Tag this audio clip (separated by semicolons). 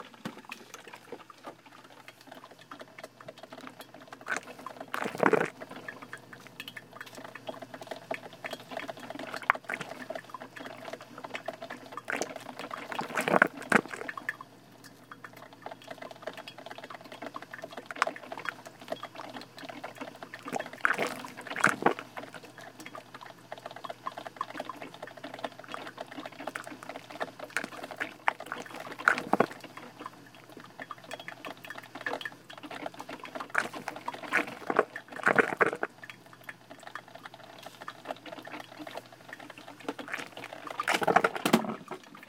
morning-routine; kitchen; gurgling; Coffee